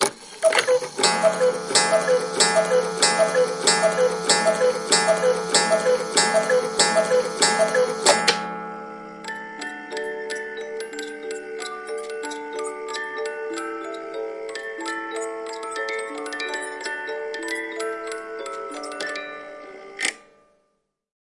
Clock (Cuckoo) - Chime 12:00
Cuckoo clock chimes 12:00 (cuckoo comes before chime), musical box mechanism plays tune afterwards.